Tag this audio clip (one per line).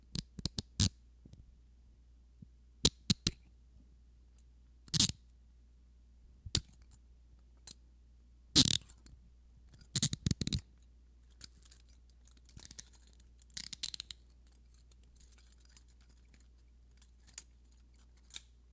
foley
handcuff